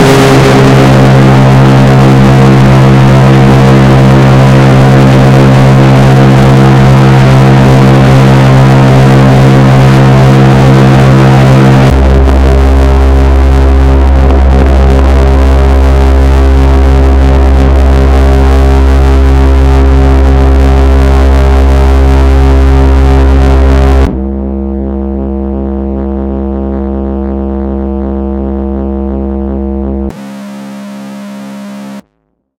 a long distorted bass, filtered and splitted in 3 part- WARNING! this sound is very loud! -I needed aggressive sounds, so I have experienced various types of distortion on sounds like basses, fx and drones. Just distorsions and screaming feedbacks, filter and reverbs in some cases.